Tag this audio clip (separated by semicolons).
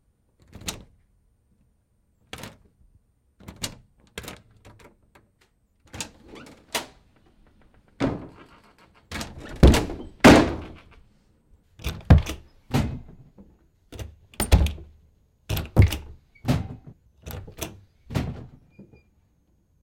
Closing; Closing-door; Door; Doors; door-nob; doornob; open; open-door; opening-door